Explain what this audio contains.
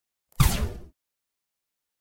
Recorded with Rode SE3
Rubbed fingers of bass guitar strings and sped up, also moved objects past the microphone quickly for a wooshing sound and pitch shifted heavily to create distortion.